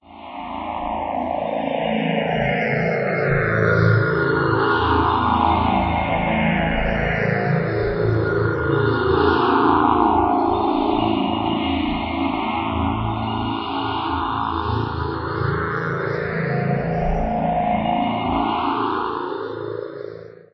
FM Waves
FM pad created with a Yamaha FS1R and processed in MetaSynth.